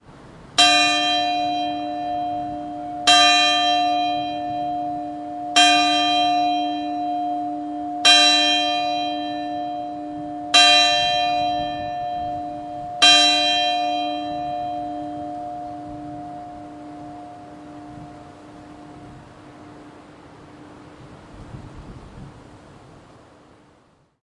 Taken in front of the church on Ilovik, a small Croatian island. It was a windy morning, so you can hear wind noise, despite a dead cat.